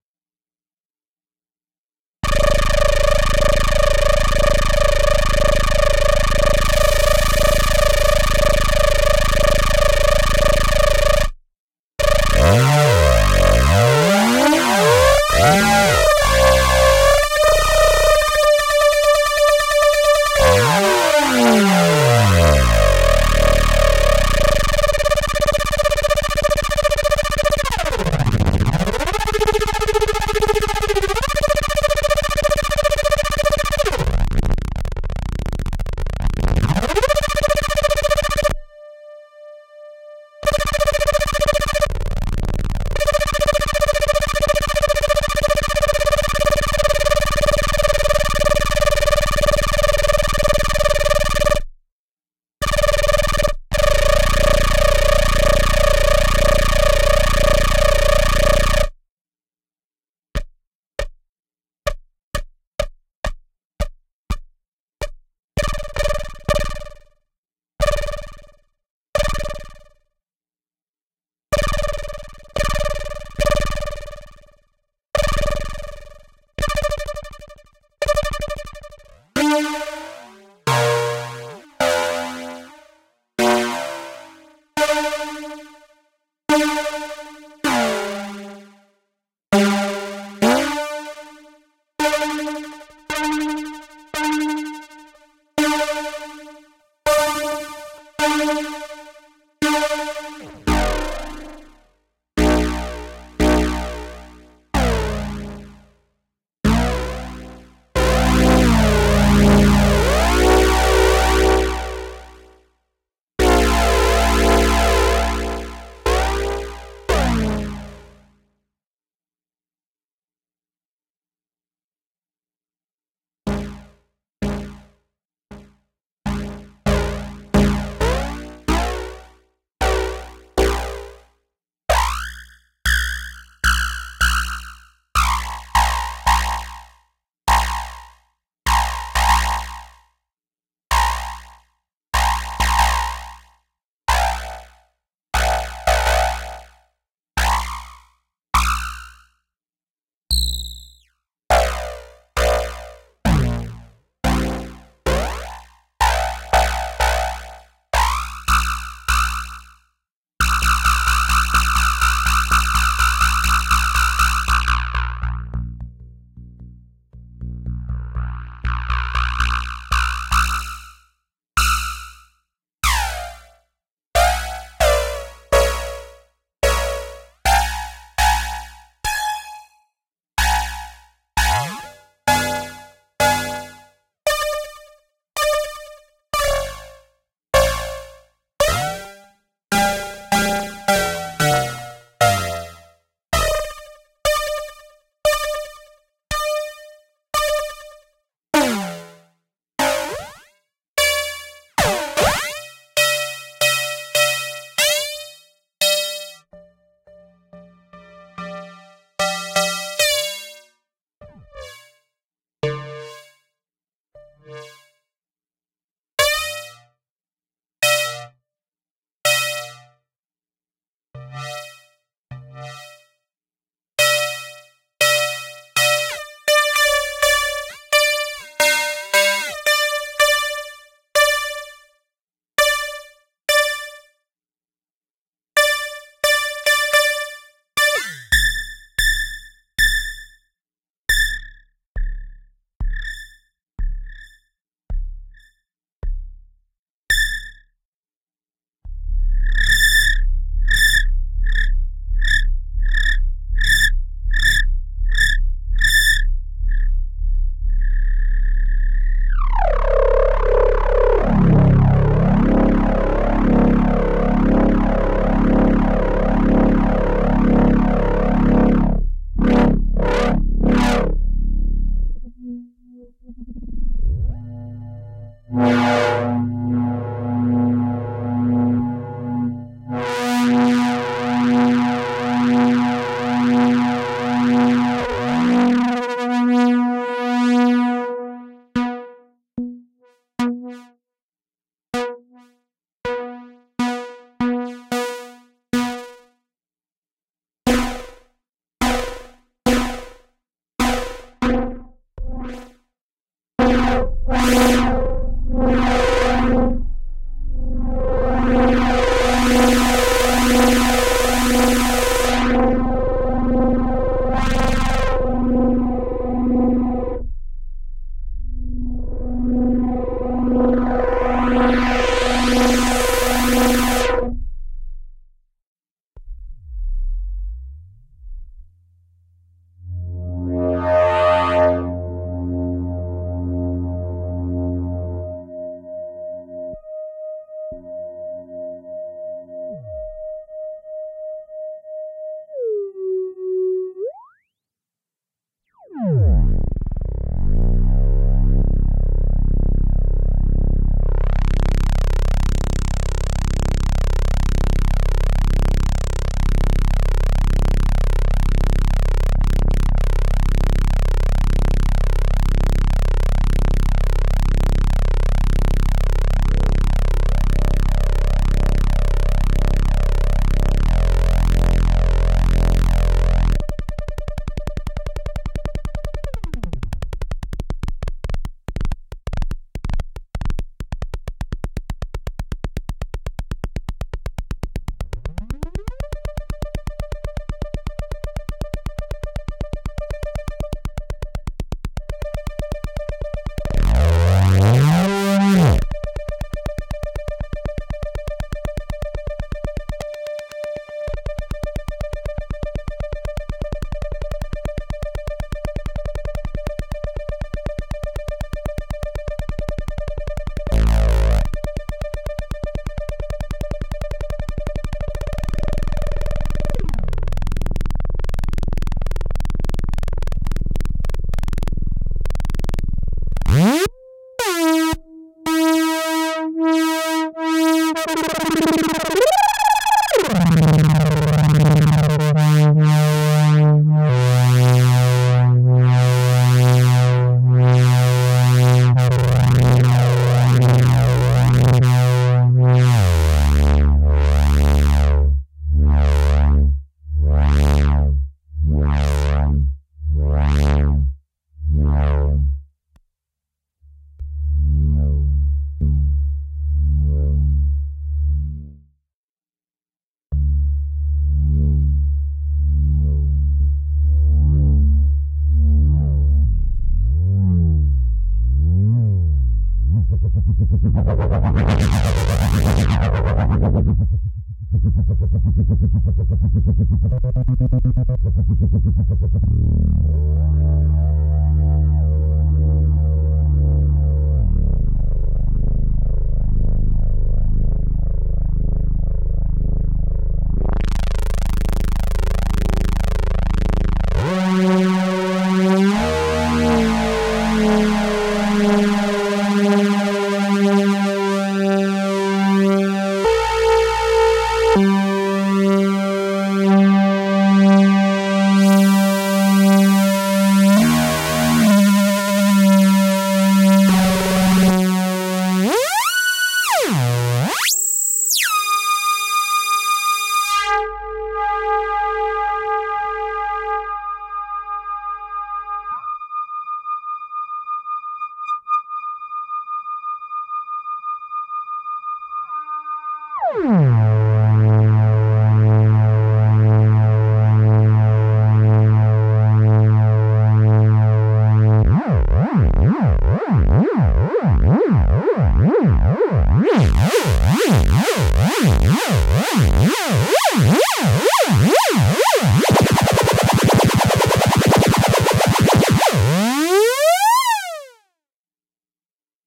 Werkstatt Test 2
Testing out the Werkstatt-01. Slightly EQ'd on the low end.
Moog, Testing, Werkstatt-01